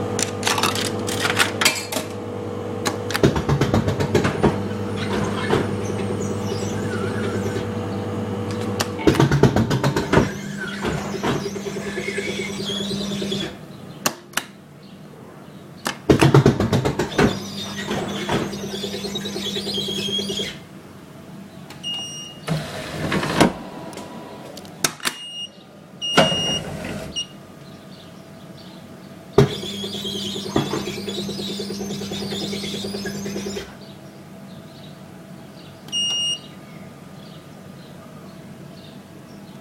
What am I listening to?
(Trying to)operate/operating a very tricky-to-use vending machine. What I had to do was keep pressing this button until I could get what I wanted.
annoying, beep, button, machine, motor, press, squeaky, vending, vending-machine